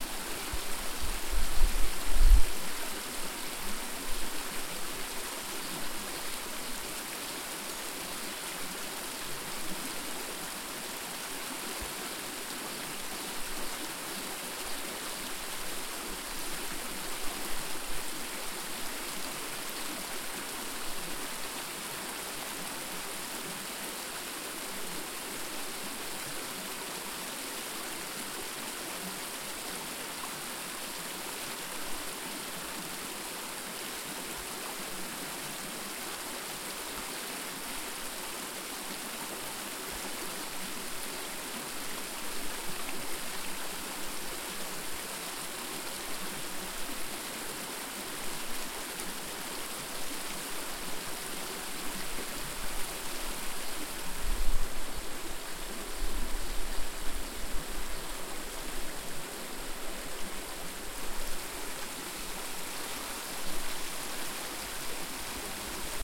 field recording of a fast moving river in rural wisconsin